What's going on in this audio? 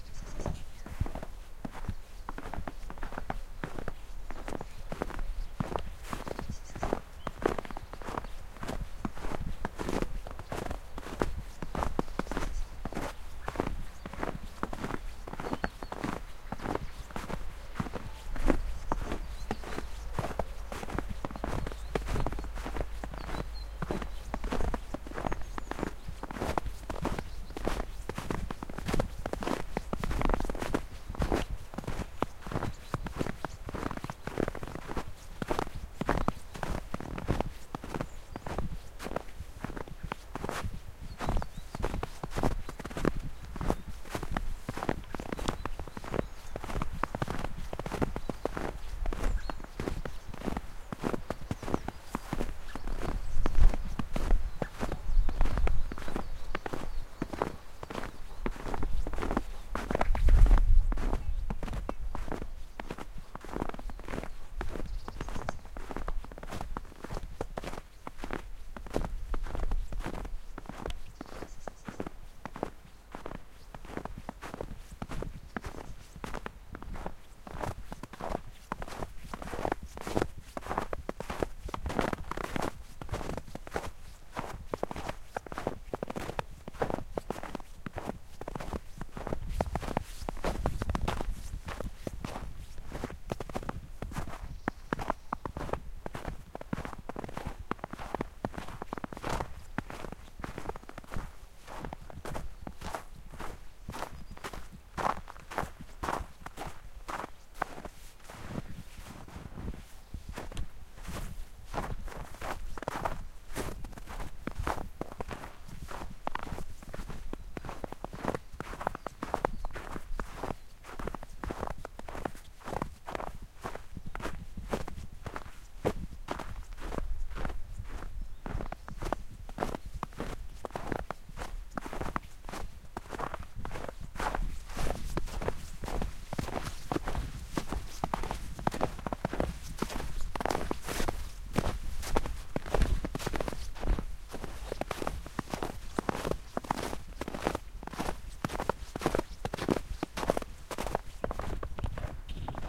long walk on the snow
Recordered on zoom h1n man is walking on the snow road in freezing day
freezing, man, snow, walking